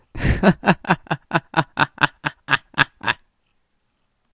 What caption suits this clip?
evil chuckle human laugh
the sound of a villain laughing
human, laugh, evil, laughter